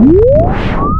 delaveaud bettina 2012 13 son2
Made using Audacity only :
Generate white noise
Apply a plugin : phaser
- phases : 17
- original/modifié : 128
- fréquence LFO (Hz) : 0.1
- phase de départ LFO (deg.) : 0
- profondeur : 100
- retour (%) : 60
Fade out at 2.10s
Amplification of 2dB at 0.30s, 1.00s and at 1.70s.
Apply a plugin : compresseur
- seuil : -48dB
- niveau de bruit : -40 dB
- ratio : 2:1
- attaque : 0.2
- relâchement : 1.0s
• Typologie : Continu varié (V)
• Morphologie :
- Masse : son seul
- Timbre harmonique : vaporeux et futuriste
- Grain : grain fin et rugueux
- Allure : stable, pas de vibrato rapproché, pas de chevrotement, mais des ondulations légères
- Attaque : l'attaque est graduelle
- Profil mélodique : variations serpentines
air-blast, spacecraft, field-recording